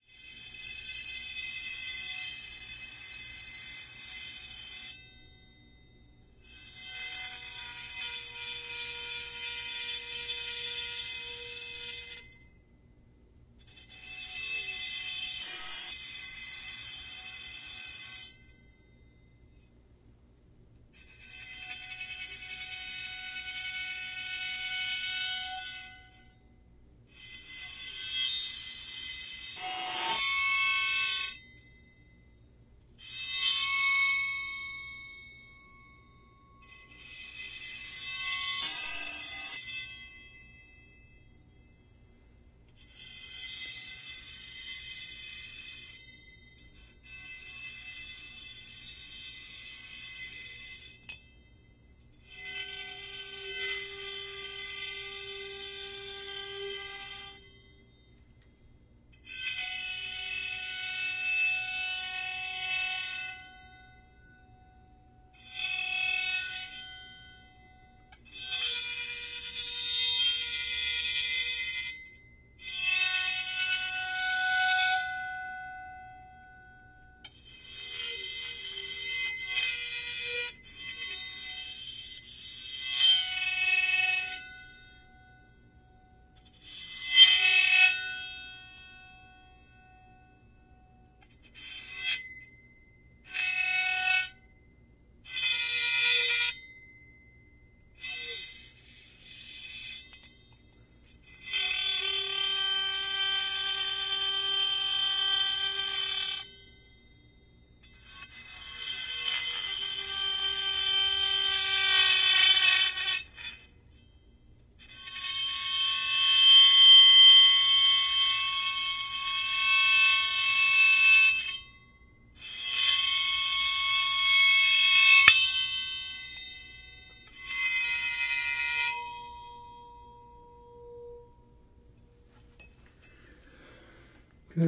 bowed cymbal
Using a violin bow on a small cymbal.
metal, whine, eerie, danger